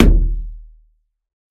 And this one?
WATERKICK FOLEY - HARM LOW 08
Bass drum made of layering the sound of finger-punching the water in bathtub and the wall of the bathtub, enhanced with lower tone harmonic sub-bass.
bassdrum, foley, kick, percussion